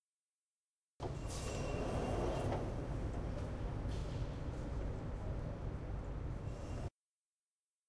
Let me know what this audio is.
This sound shows an automatic door opening when someone pass through it. We can listen some wind and background noise.
It was recorded in the entrance of Tallers building in Campus Poblenou, UPF.
door, campus-upf, Tallers, Street